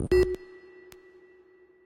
Just another beep, I love it.